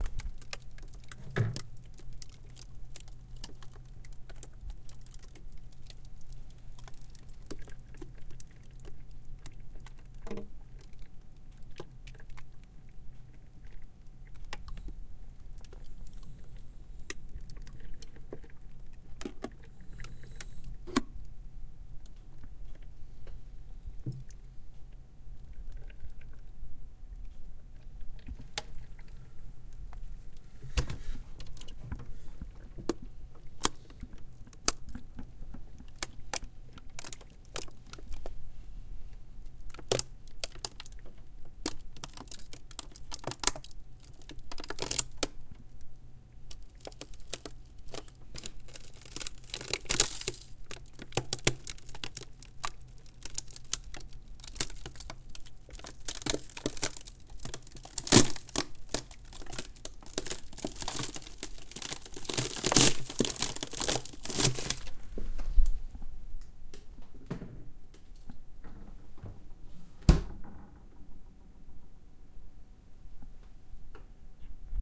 congélateur craquements
Zoom H4, Sony MDR7509, wavelab 5 editing for better rendering (EQ, HPF, compression, stereo).
Subtle spatialized details of crackling ice in my freezer to produce a very organic feeling sound.
freezer, fridge, home-recording, organic, house